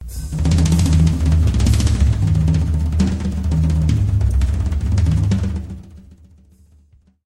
toms - tom fills